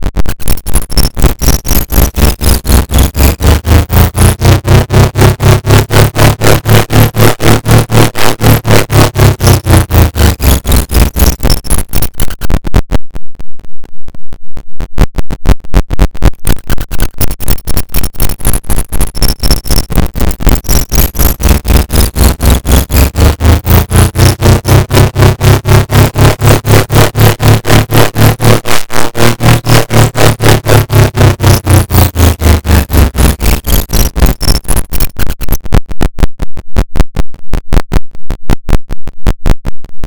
There's been a breach in the hackframe. Prepare to launch diagnostic security mi55iles.
machine, abstract, breach, electronic, glitchmachine, droid, distorted, hack, click, crunchy